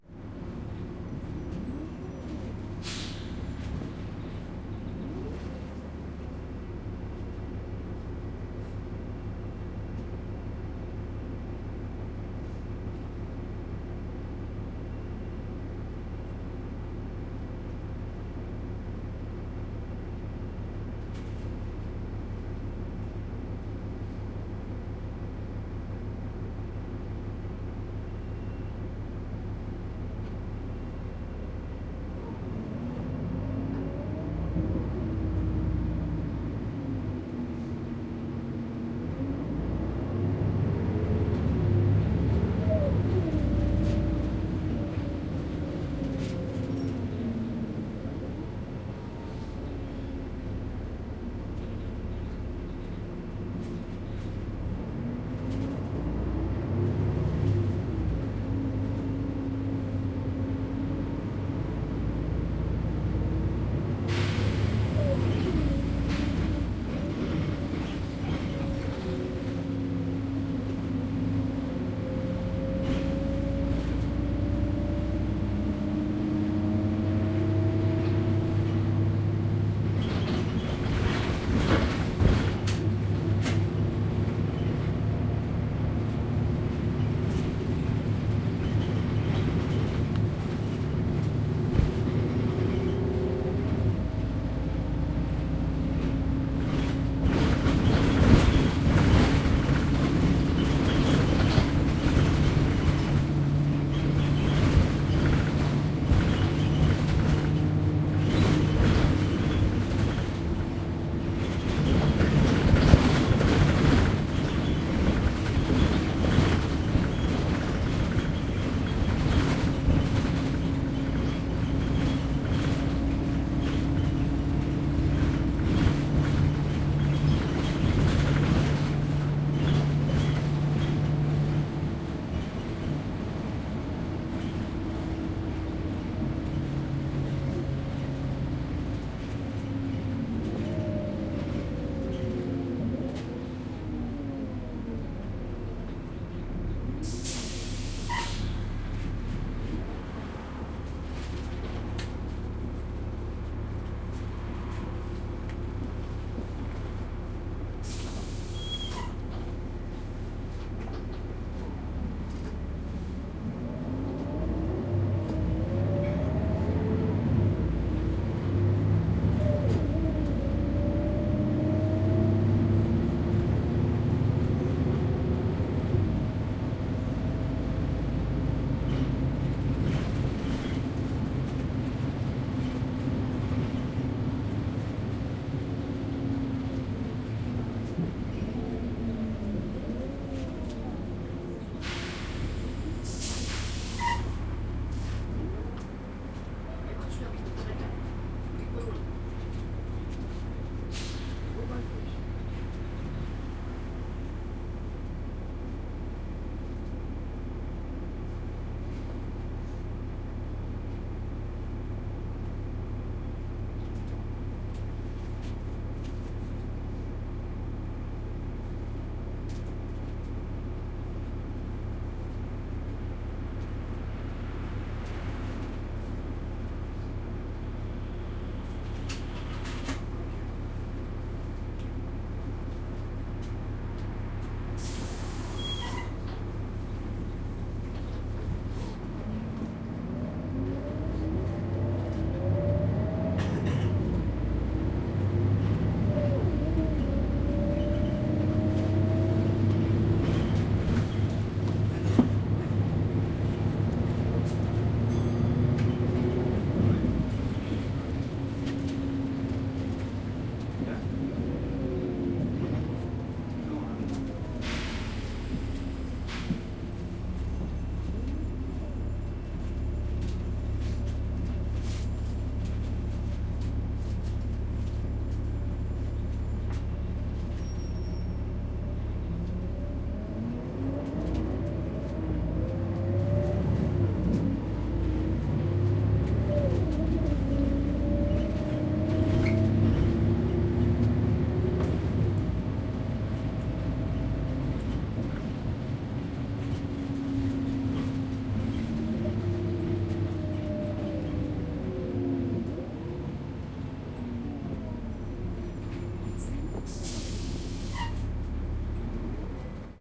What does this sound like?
Bumpy bus ride from Glasgow city centre to Paisley, Scotland
A bumpy early morning ride from central Glasgow to Paisley (Scotland) on December 4, 2013. Recorded with Zoom H2.
doors, passengers, field-recording, zoom-h2, street, interior, ambience, noise, bus, city, engine, traffic, paisley, people, transportation, modern, glasgow